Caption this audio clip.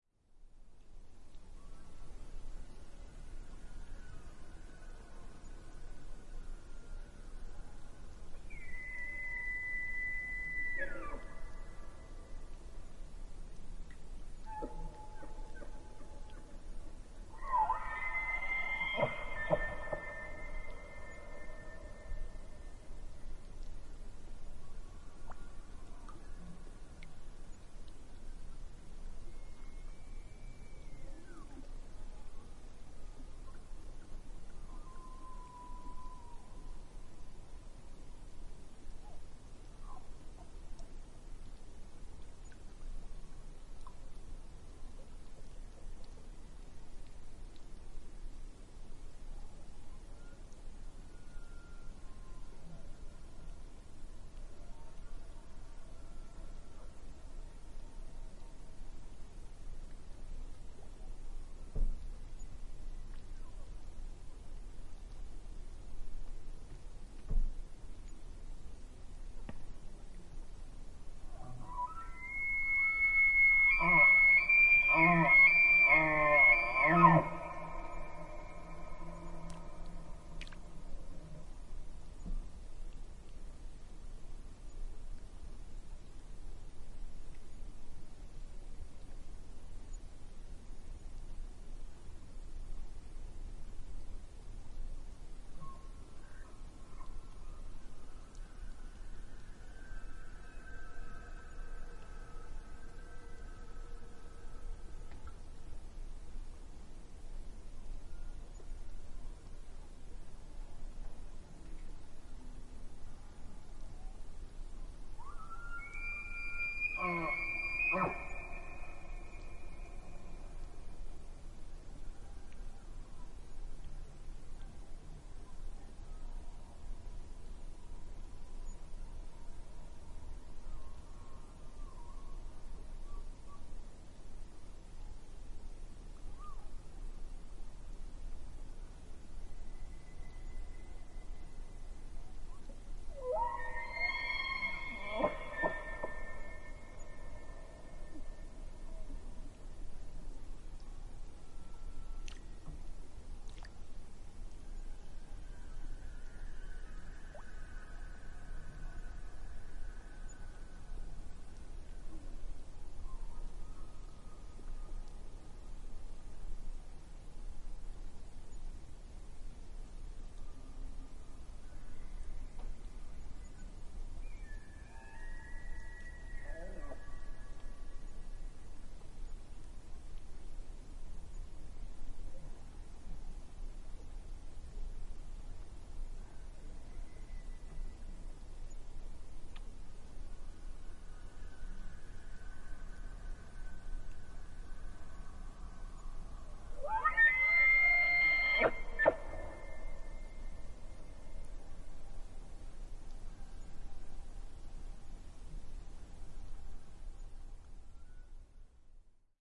lake ambience with elks
a recording from the sound library of Yellowstone national park provided by the National Park Service
nature, elk, field-recording, animal, ambience, water, soundscape